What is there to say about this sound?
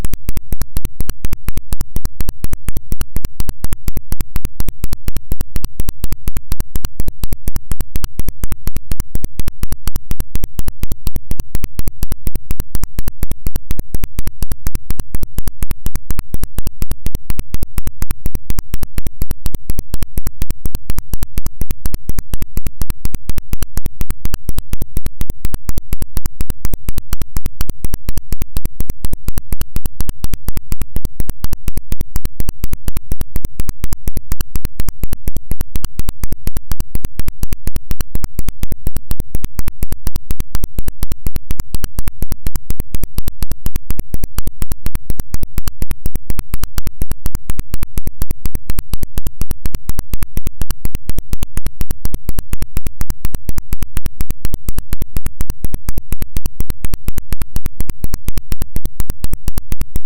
Click Dance 60
So when I launched Audacity within Gentoo Linux, a strange ground loop occurred. However, adjusting the volume settings within alsamixer caused the ground loop to become different per volume settings. The higher the volume, the less noise is produced; the lower the volume, the more noise is produced.
Have fun, y'all!
sound, effect, ground-loop, soundeffect, electronic, sfx, sci-fi, click, lo-fi, strange, future, dance, digital, abstract, glitch, loop, electric, noise, weird, fx, freaky